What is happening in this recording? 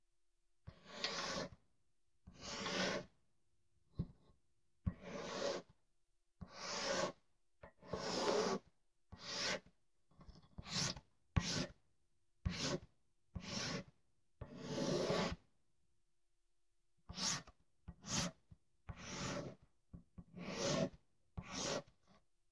Chalk on sidewalk
I intended this to sound like chalk on a sidewalk. I believe that is the sound I captured. There are several lines being drawn, each with a slightly different sound. It was produced with chalk on a blackboard.
Recorded using a cheap lavalier mic on a Galaxy S5.
blackboard, chalk, sidewalk